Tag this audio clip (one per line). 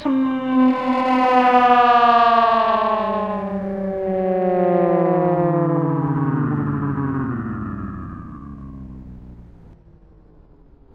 voice
stretch